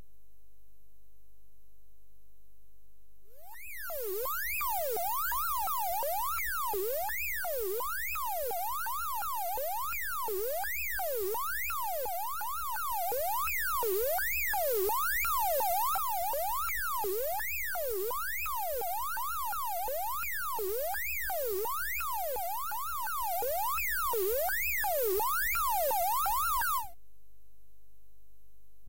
scifihalytys1 - scifi alarm 1
scifi alarm done with clavia nordlead 2 and recorded originally with fostex vf16.
alarm, imaginary, scifi, siren, synthetic